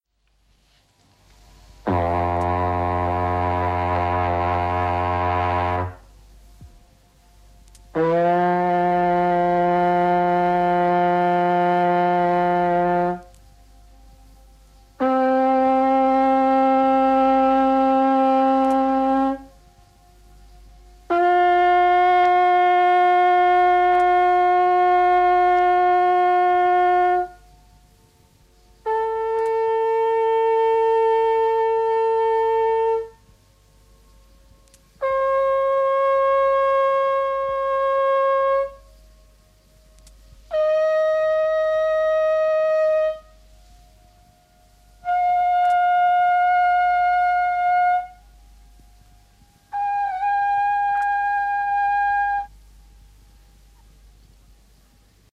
The scala of the Revheim brass lure.